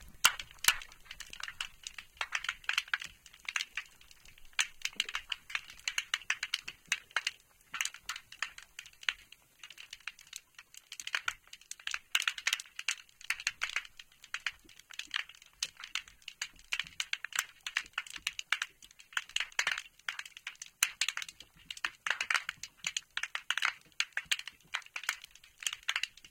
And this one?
Hydrophone at boatramp 2
The sound of marine snapping shrimp in the ocean at an Australian boat-ramp. This uses a home made hydrophone, see comments.
boat-ramp, crackle, field-recording, hydrophone, marine, snapping-shrimp, stereo, underwater